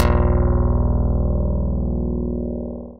synth KS 02
Synthesis of a kind of piano, made by a Karplus-Strong loop.